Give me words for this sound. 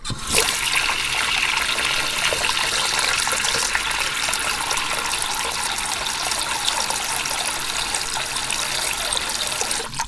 Water in sink
pouring, sink, water